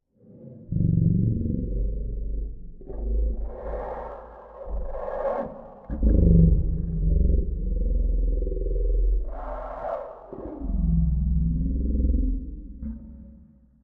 Scary Demon Roars
Tags: Demon Roars,Demon,zombie,ghost,scar scary bogey spooky terror terrifying sinister thrill Gothic anxious drama haunted dramatic suspense creepy background-sound phantom weird nightmare macabre macabre atmos atmos hell hell frightful delusion shady nexpectedly grisly imaginair grisly imminent phantasm spectre wierd wierd fearful depressive fear fearing fearing cellar general threatening tonal threatening impending impending afraid frightening
anxious, atmos, background-sound, bogey, creepy, delusion, Demon, drama, dramatic, frightful, ghost, Gothic, haunted, hell, macabre, nightmare, phantom, Roars, scar, scary, shady, sinister, spooky, suspense, terrifying, terror, thrill, weird, zombie